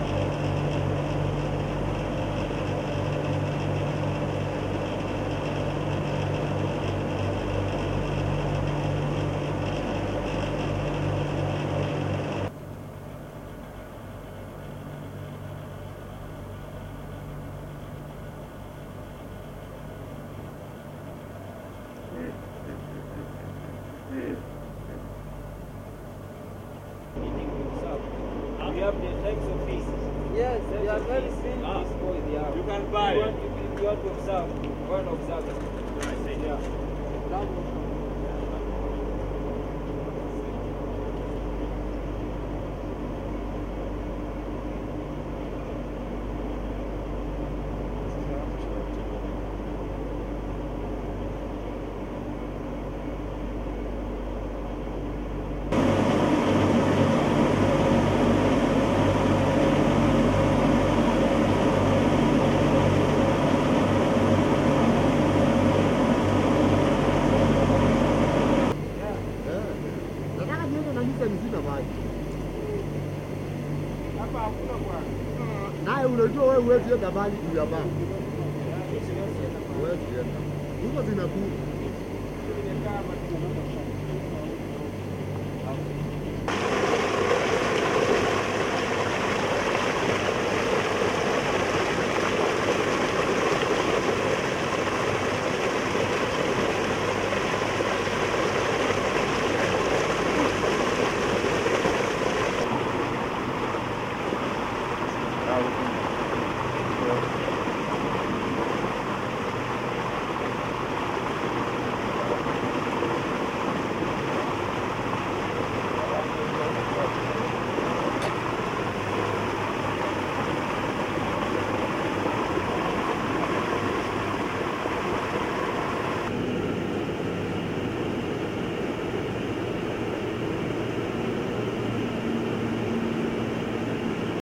dec2016 Naiwasha Lake Water Pumps ambient Kenya
Kenya - Naiwasha Lake FlowerFactory water pumps
Lake, Naiwasha, Pump, Water